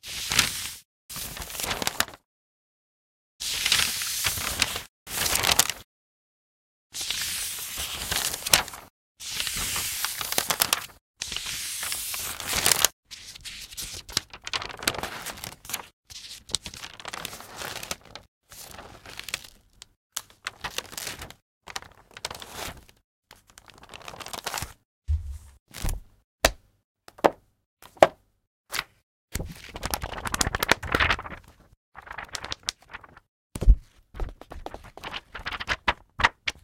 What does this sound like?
Turning pages / Pasando páginas
Some takes on page turning. Recorded with a big diaphragm mic and a cheap pre.
Algunas tomas pasando páginas de un libro. Grabadas con un micrófono de gran diafragma y un previo barato :)
book, libro, page, pages, pagina, paginas, papel, paper, turn, turning